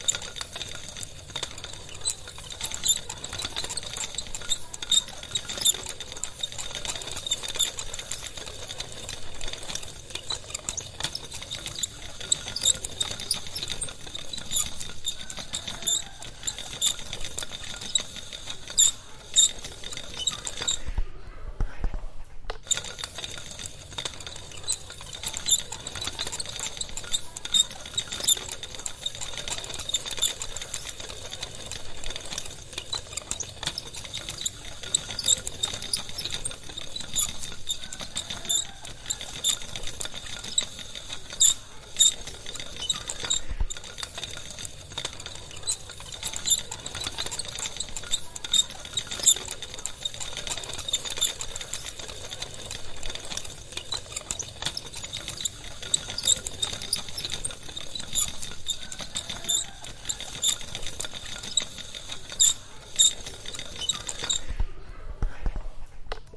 Sound from toy Lego car drive on tile.
It is like sound in Hanna Barbara cartoons for car in Flintstones. Record use H4n Pro. 2019.01.09 12:00, long version for cartoon (repeat for long version) 2019.01.11 06:20